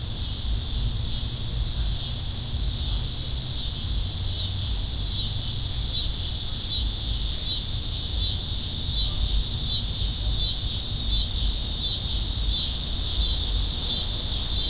crickets parking lot +skyline roar bassy and distant voice
roar, parking, crickets, bassy